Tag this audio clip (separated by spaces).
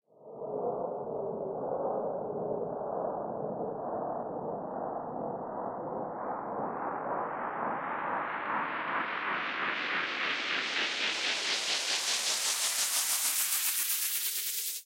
abstract digital effect electronic fade fade-in future fx modulation noise pitch riser sci-fi sfx sound-design sound-effect sounddesign soundeffect uplift